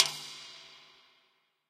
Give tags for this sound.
metal
reverb

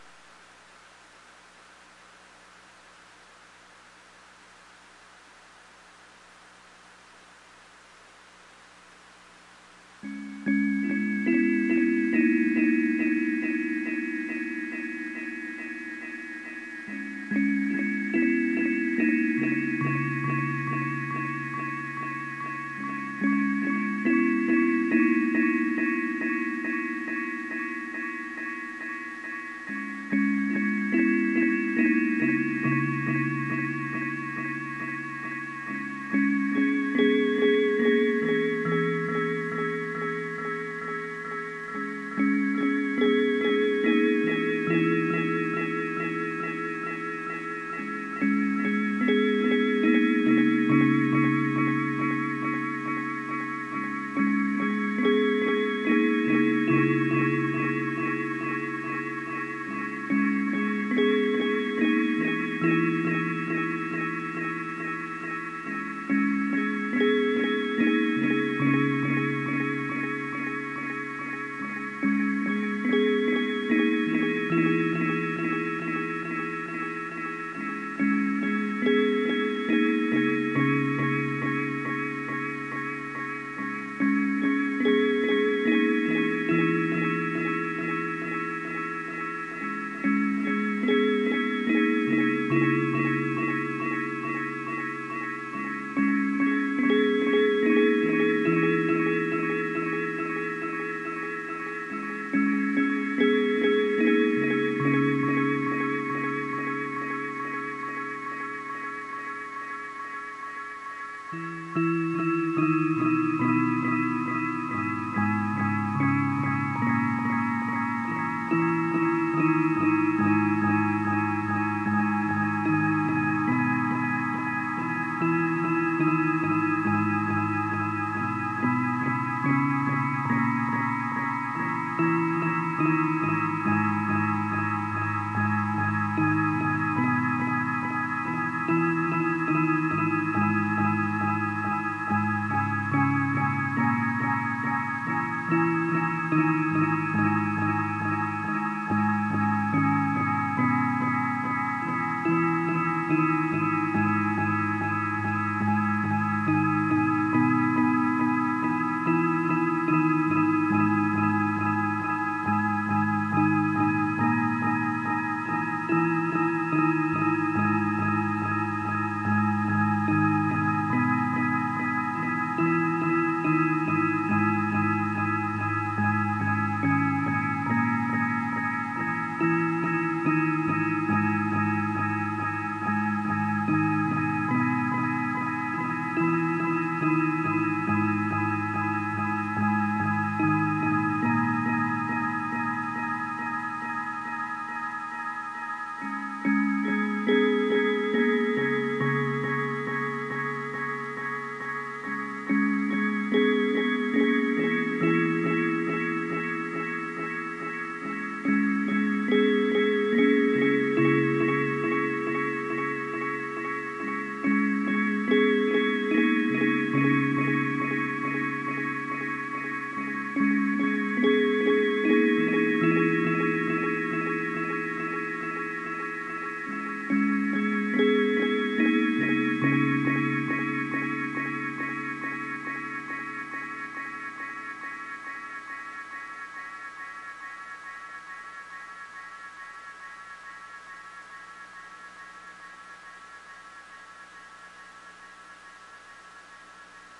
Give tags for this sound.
crackle
80s
ambience
background
beautiful
record
synth
hiss
retro
oldschool
delay
yamaha
cz101
noise
electronic
ambient
sad

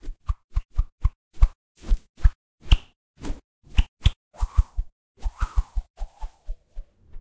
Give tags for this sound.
cable
combat
dagger
rope
sword
whoosh

